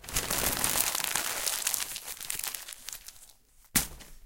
wad up2
rounded, papers, paper